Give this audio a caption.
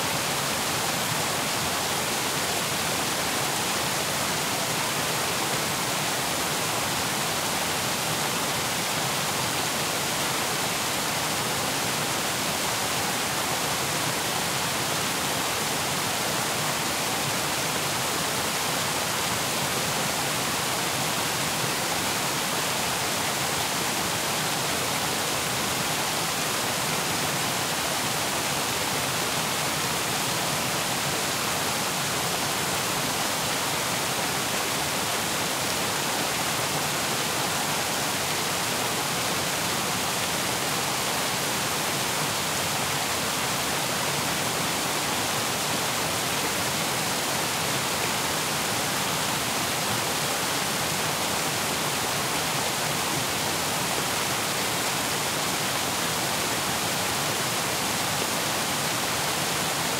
I'd love to hear about the projects you use my sounds on. Send me some feedback.
Ambience, Background, Creek, Dam, Flow, Mortar, Nature, River, Splash, Stream, Water, Waterfall